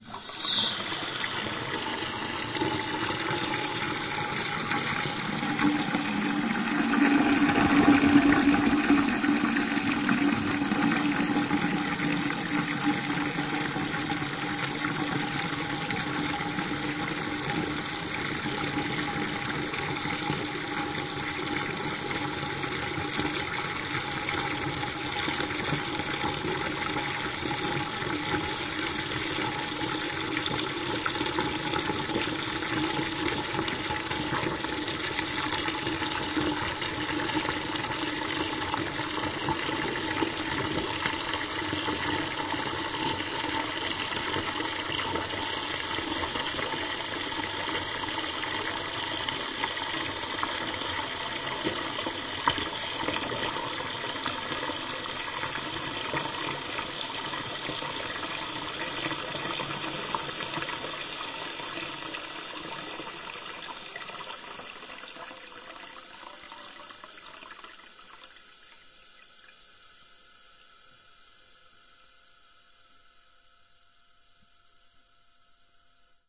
This is a recording of a toilet at the Abbey hotel in Shepherd's Bush, London, England, in April 2009. This is captured from the perspective of the inside of the tank with the lid off. I used a Zoom h4 and an Audio Technica AT-822 single-point stereo mic pointing into the toilet tank.
glug, wet, gurgle, flush, water, toilet